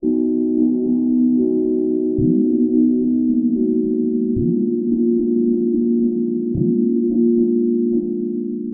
110 bpm
This lil' tune was made in FL Studio 12.4 with a rammerdrum sample and gross beat plugin...
ambient, atmospheric, chillout, chillwave, loop, melodic, warm